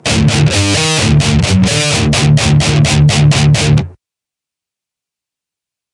DIST GUIT 130BPM 3
Metal Guitar Loops All but number 4 need to be trimmed in this pack. they are all 130 BPM 440 A with the low E dropped to D
2-IN-THE-CHEST, REVEREND-BJ-MCBRIDE, DUST-BOWL-METAL-SHOW